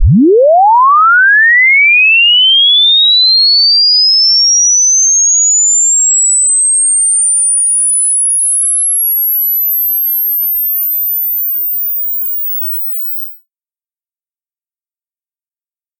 Sine sweep 1 Hz to 20 kHz
Sine wave. 1 to 20,000 Hz (20 kHz) sweep
sine-wave, sine